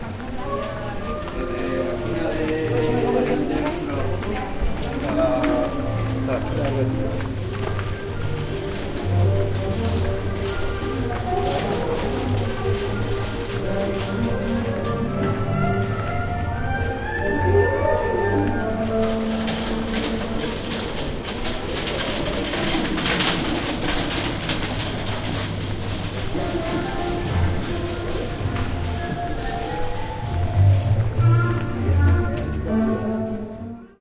streetnoise with distant street musicians / musicos callejeros, lejos